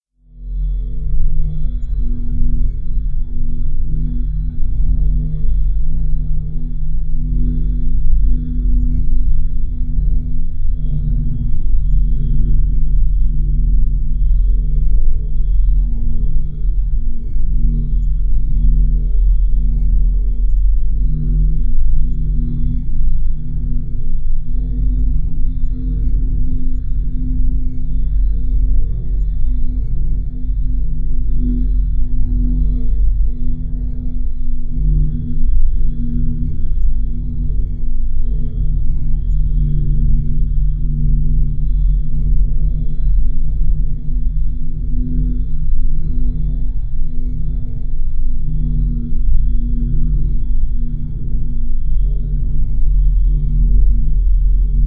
Modulaat Sector.
2 oscillators, some delay, reverb, stereo enhancer filter, chorus and a compressor.
Created with Psychic Modulation.
Mixed in Audacity
ambiance, ambient, black, cavern, cavernous, dark, darkglitch, darkness, dismal, dull, dusky, evil, fx, glitch, gloomy, modulate, odds, osc, raw, scorn, sector, shape, sorrow, soundscape, wave